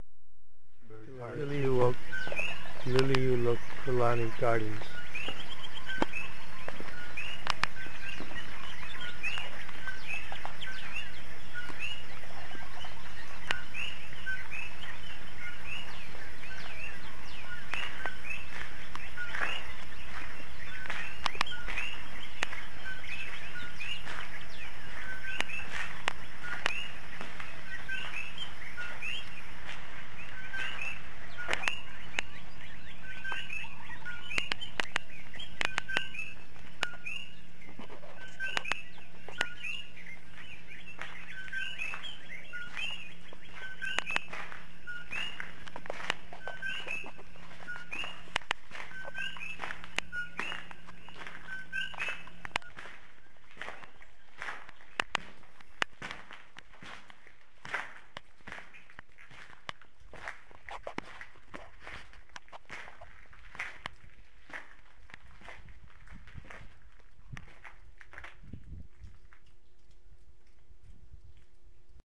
WALKING IN A CAVE
my footsteps walking in Thurston lave tube cave in Hawaii. Water dripping in the background.